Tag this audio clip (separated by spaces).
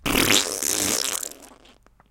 fart flatulence liquidy squishy wet